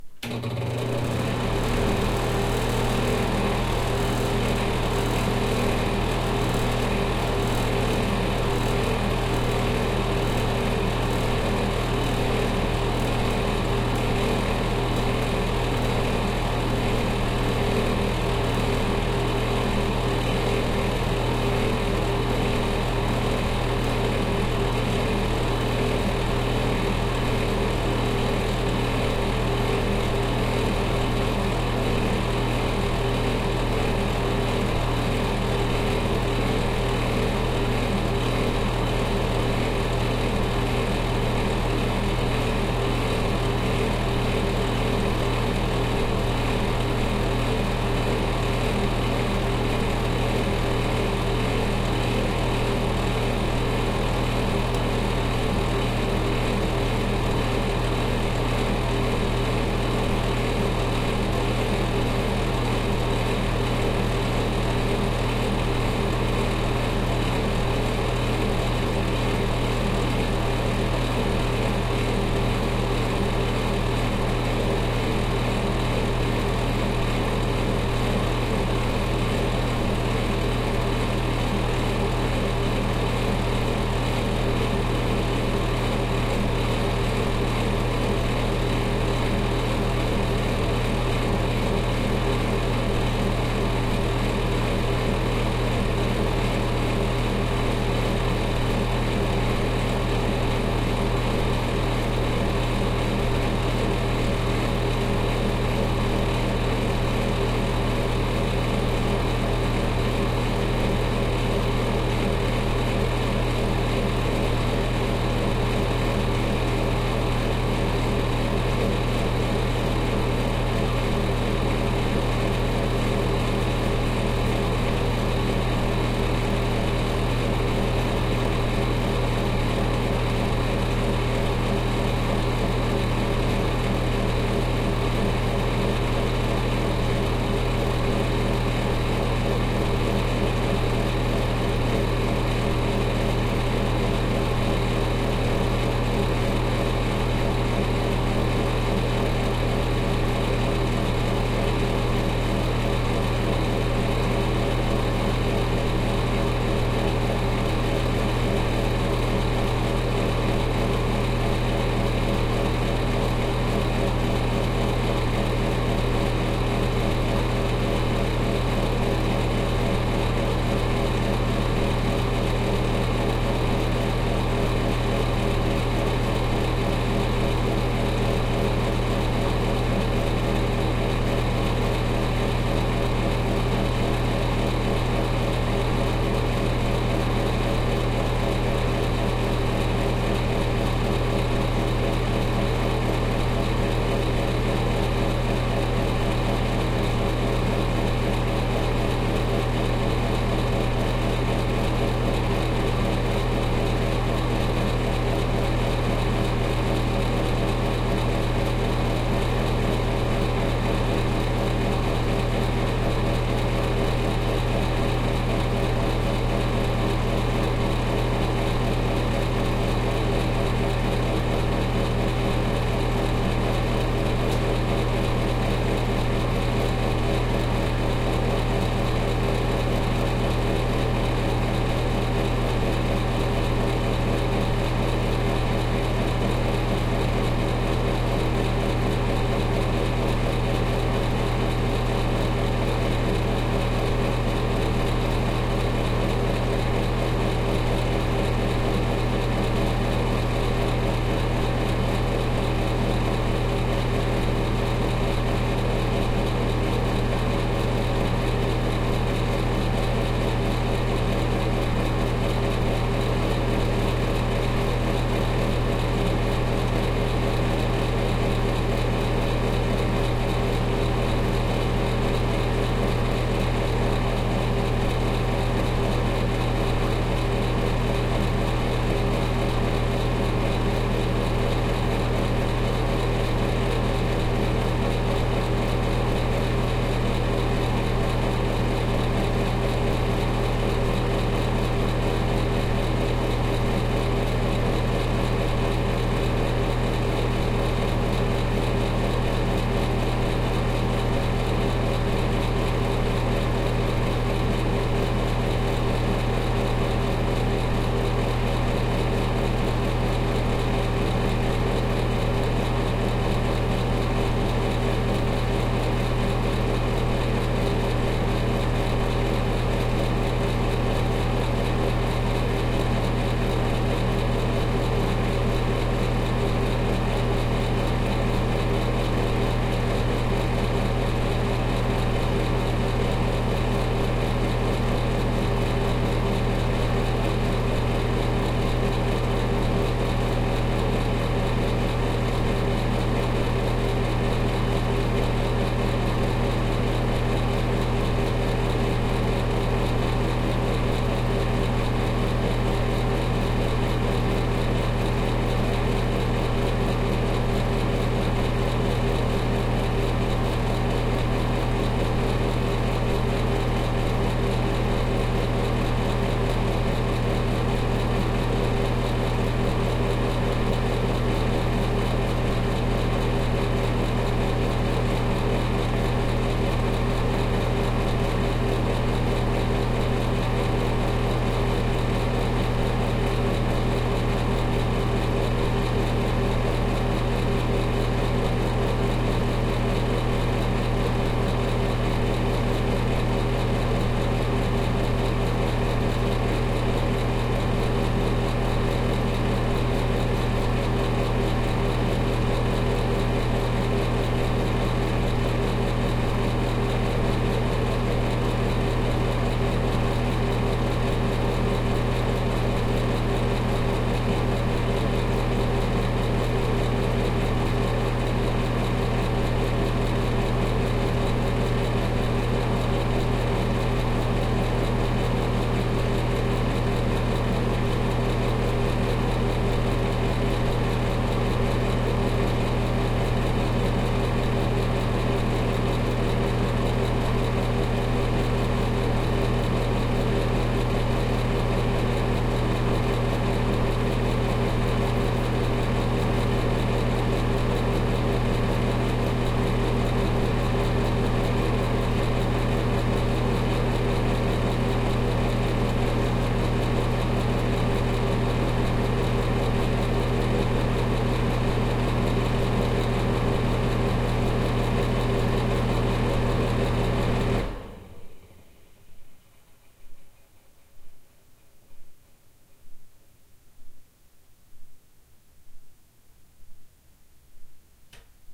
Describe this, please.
Roaring Bathroom Fan
The sound of my bathroom fan grinding away. I like listening to the pulsating tones of it. Sounds kind of like some crazy machine.
Recorded on the Zoom H4N microphones.
bathroom, continuous, fan, grinding, machine, raging, roaring